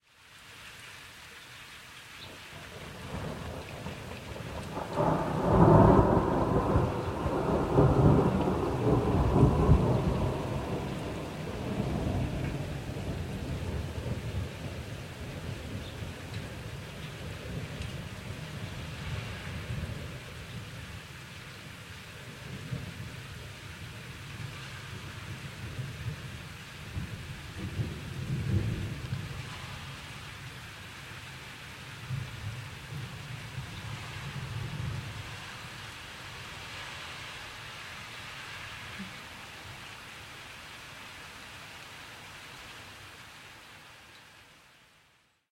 Single Thunder Clap
Amazing- a single and long clap of thunder during a summer thunderstorm.